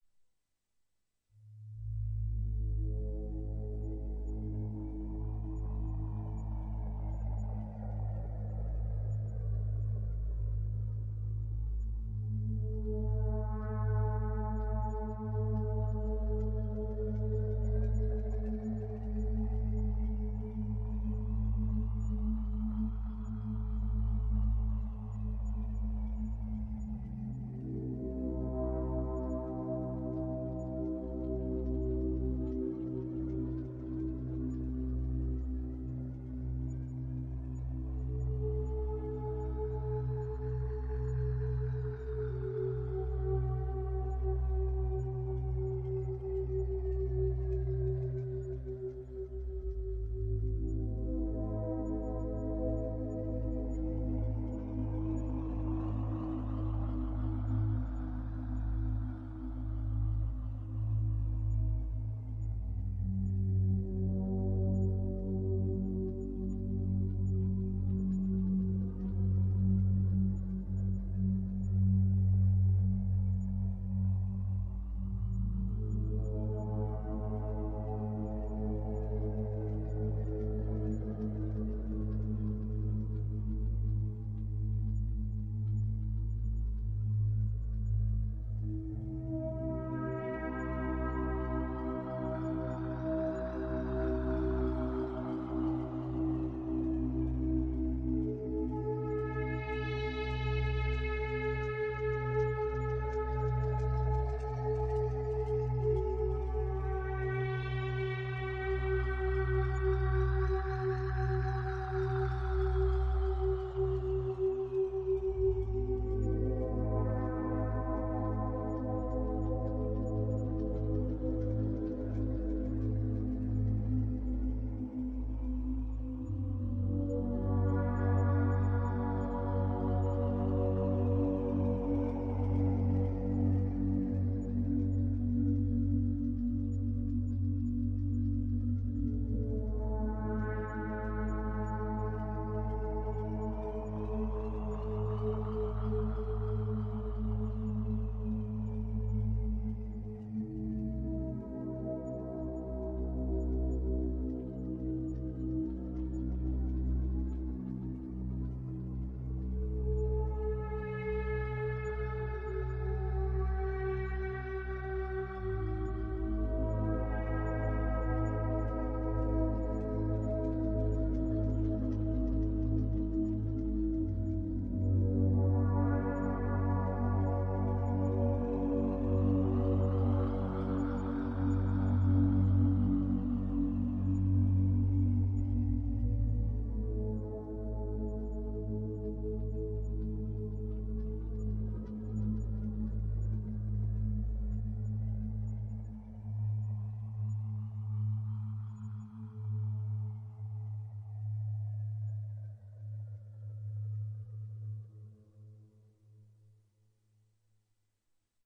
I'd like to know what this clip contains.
relaxation music #38
Relaxation Music for multiple purposes created by using a synthesizer and recorded with Magix studio.
meditation, meditative, relaxation, relaxing